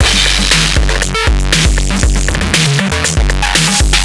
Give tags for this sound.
beats TR-808

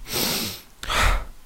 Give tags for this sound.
sigh sniff voice

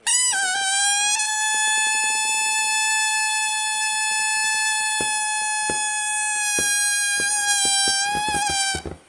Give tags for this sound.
inflate field-recording indoor

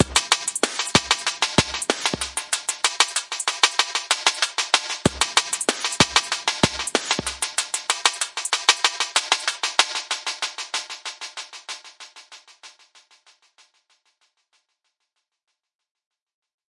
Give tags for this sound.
Beat Dnb Drums

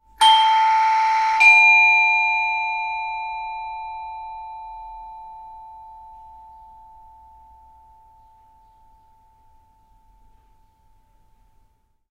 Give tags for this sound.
bell door doorbell ringing rings

